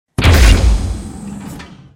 Robo Step 2
I’m making soundscapes for fun and just wanna share what i’ve made in my projects. I’m not a professional. Just a dude.
Sounds I used:
339345__newagesoup__metal-dirt-step
414173__panxozerok__bionic-1
Gears 5__moutrave__hydraulic-door-opening
80498__ggctuk__exp-obj-large01
Steamy__sukritsen__steam
588466__cartoonrob__creaky-ratchet
Battle, Robotics